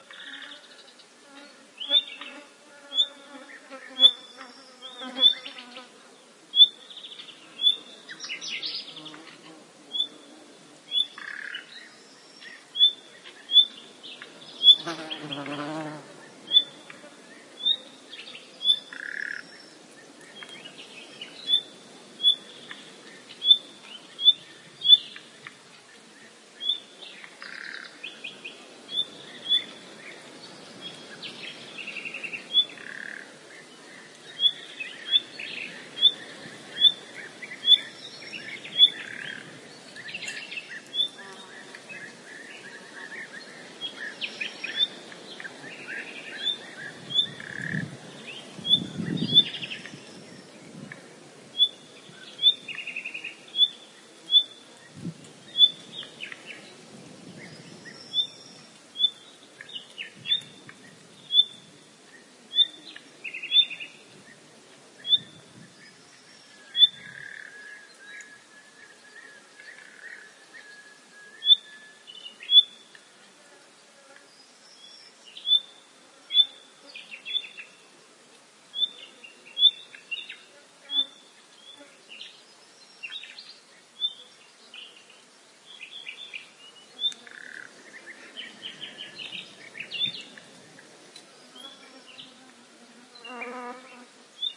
20060510.hedge.ambiance.binaural02
ambiance inside a dense hedge of willows and brambles. One can listen to insects flying (and biting me!) along with nightingales. Soundman OKM >iRiver H120. /ambiente dentro de un seto denso de zarzas y mimbreras, con sonido de insectos y ruiseñores
insects, nature, donana, binaural, field-recording, ambiance, birds, spring